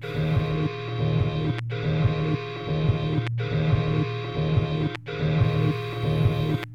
A repeating loop with a nice click in it. Originally some music I made that didn't make it so to speak. Loops seamlessly.